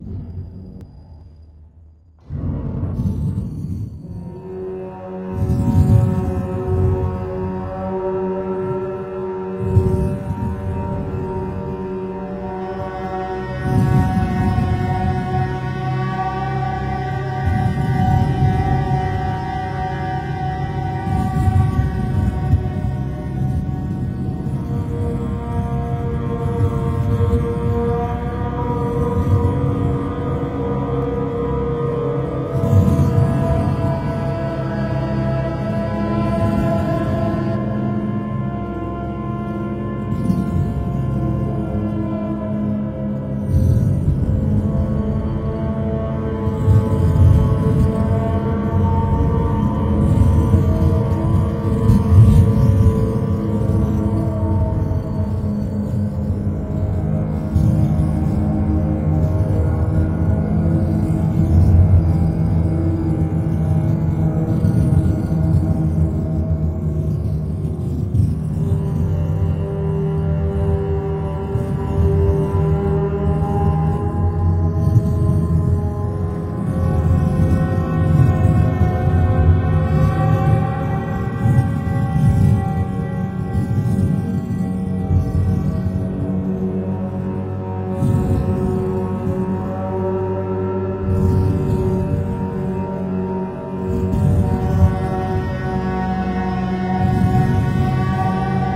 Dark Cave Horns Fantasy Creatures War Thriller Scary Atmo Ambience Atmosphere Surround North